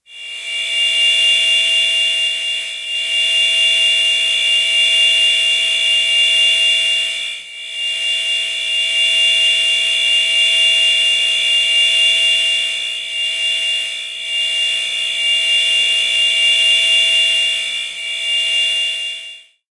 Sample generated with pulsar synthesis. High-pitched metallic drones with a slight ringing.